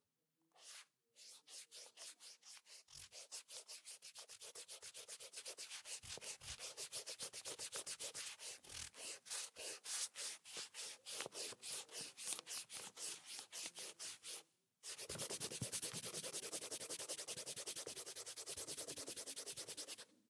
Sliding an eraser on a piece of paper